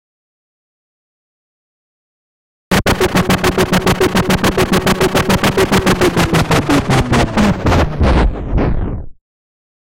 Hi-Bass wobble with Tape Stop - Steinberg's Retrologue
tape, wub, pitchbend, pitch, time, sound, slow, synthesizer, effect, bass, wobble, dubstep, tapestop, wubz, intense, bitcrush, synthesis, formant, EDM, crush, stop, harsh